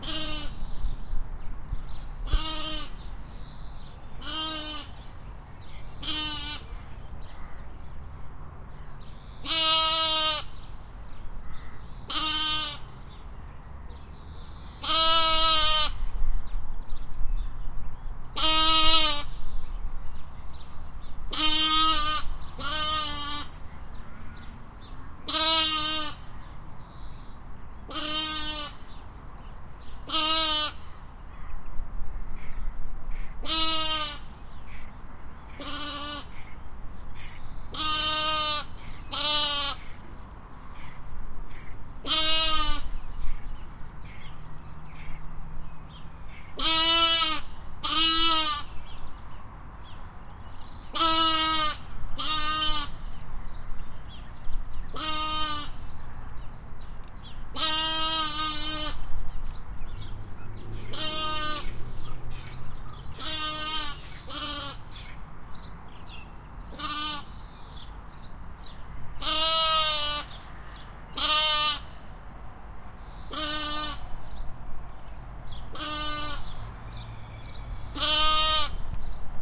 Lamb outside fence in distress, calling to its mother on the other side of the fence. Recorded on a Marantz PMD 661, 23 August 2021, with a Rode NT4.